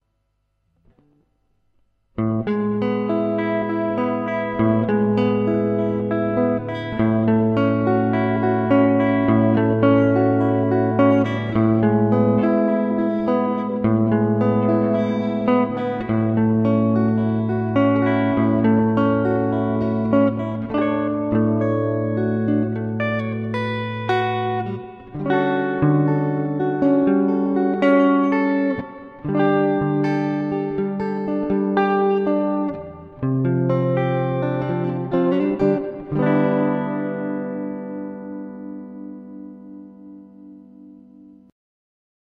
This is electroguitar composition, etude, where i played light open-chords. Record in Cubase, through "presonus inspire 1394", whith using reverb (Electro-Harmonix holy grail plus)guitar pedal. Melodical.
Chords, clean, echo, electric-guitar, electroguitar, Etude, experimental, melodic, melodical, music, reverb, reverberation, sample, song